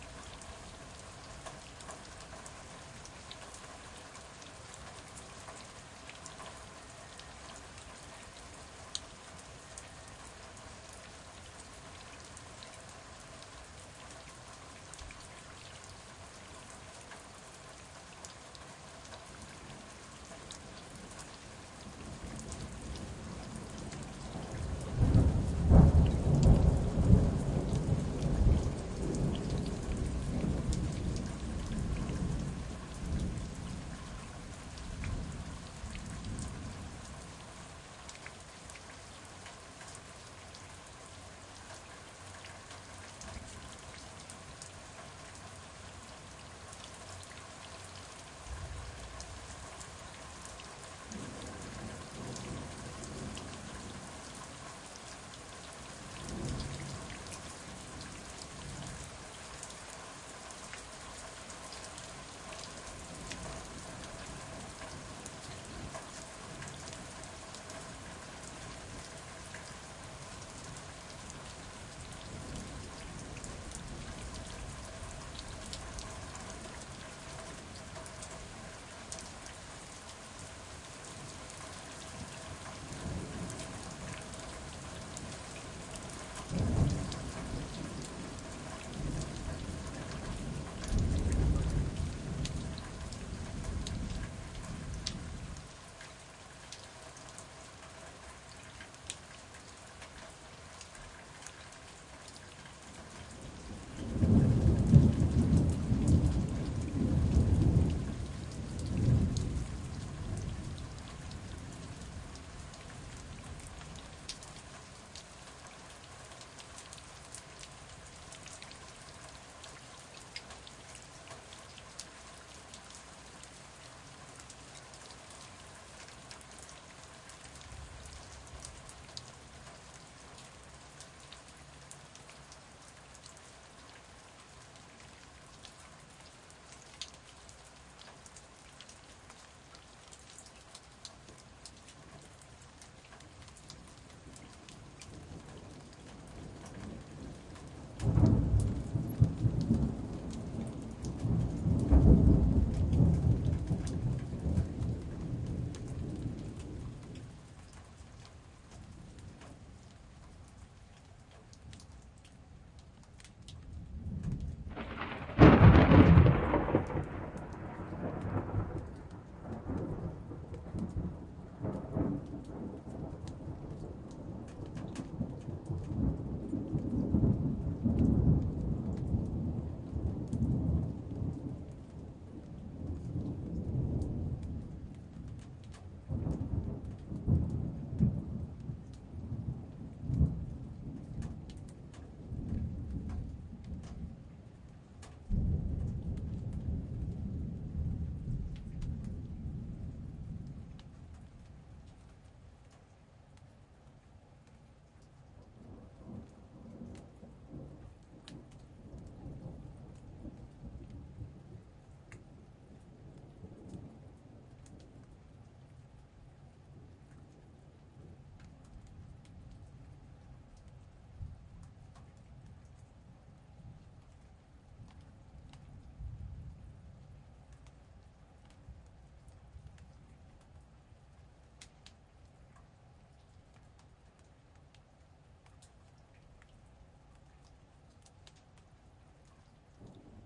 Basement Alley Rain Thunder Rumble Lightening Strike FSP4824
I believe this was recorded with a Sound Devices 702 and a Neumann RSM 191 Stereo Shotgun in a concrete stairwell. You can hear the rain hitting the stairs and going down the drain. It's also hitting some junk at the top of the stairs. There are Big Thunder Claps and More Distant Rumbles.